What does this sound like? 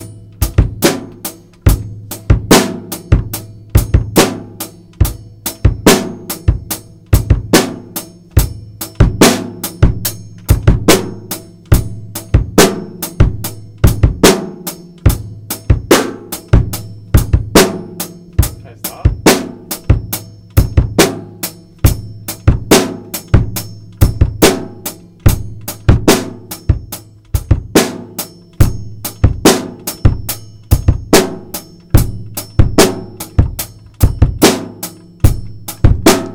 Drum3WAV
drum, drumming, music